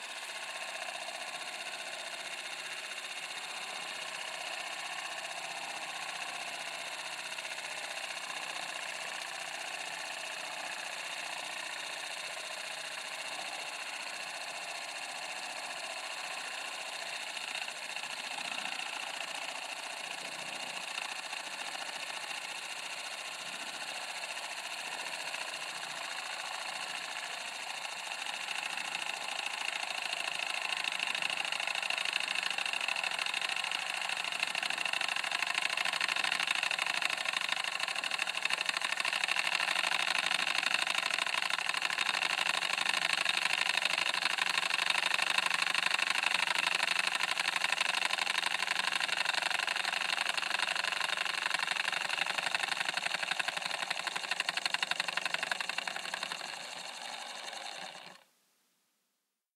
DeJur Electra - 8mm Movie Camera - 01
Film rolling through 8mm Movie Camera
Recorded on Tascam DR-40
Film, Cinematic